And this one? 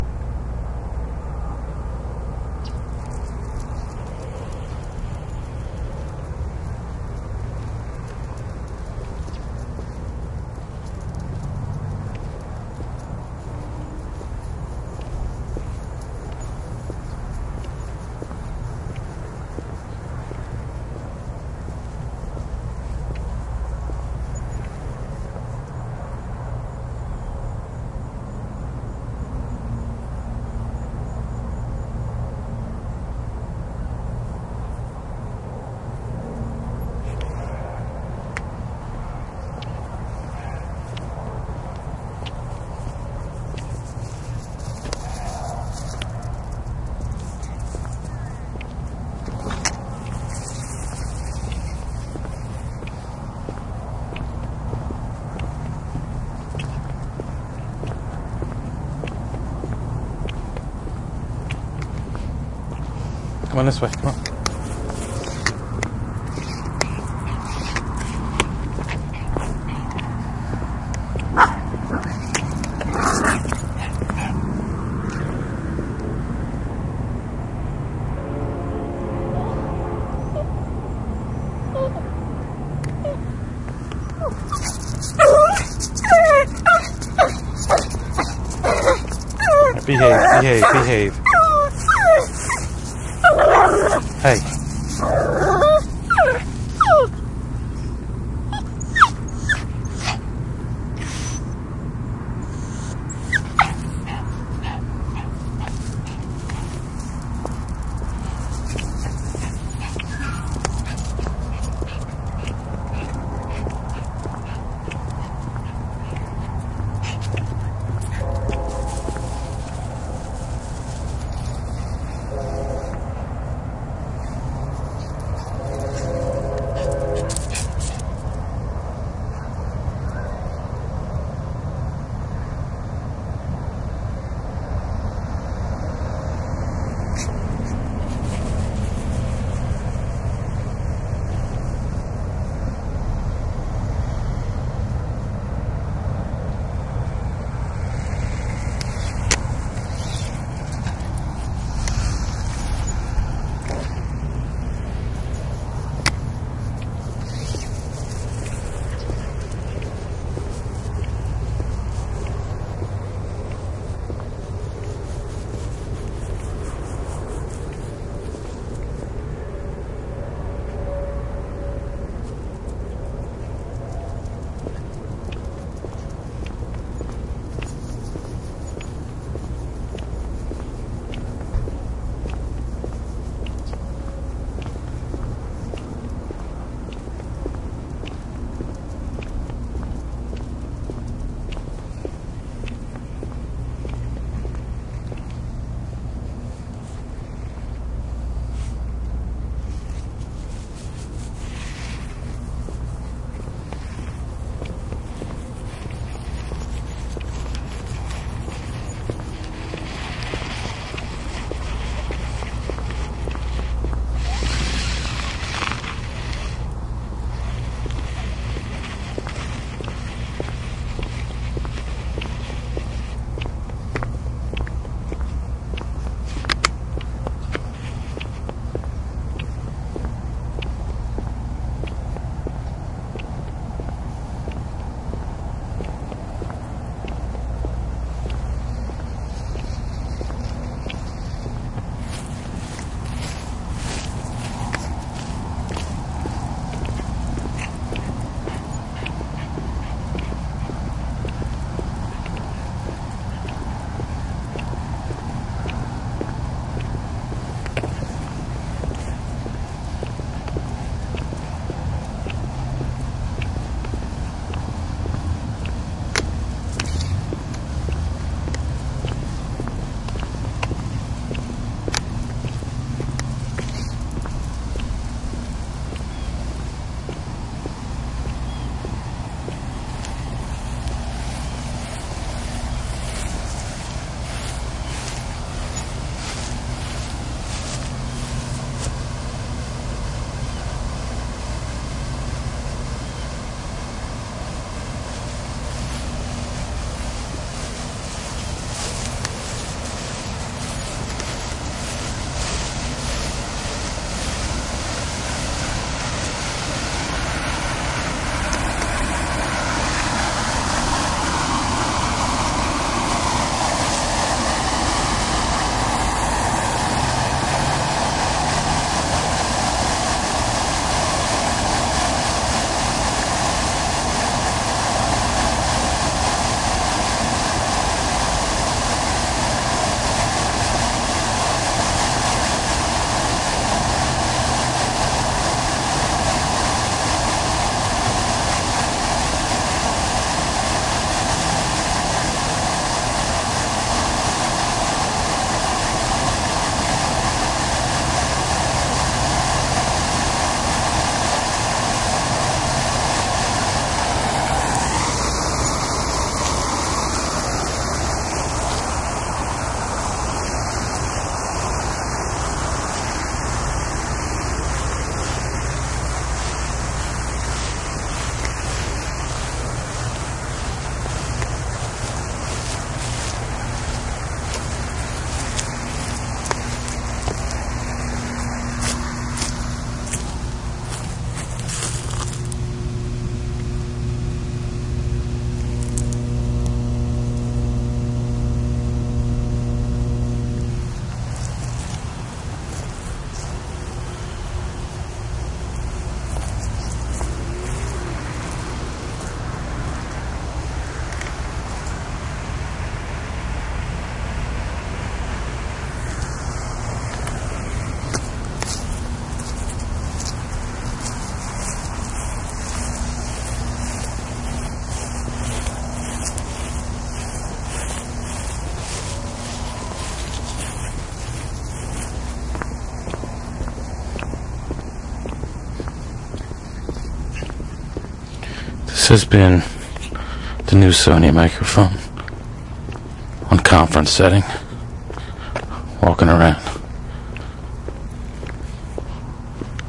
SonyECMDS70PWS walkingdog train
digital, dog, electet, field-recording, microphone, test, train, walking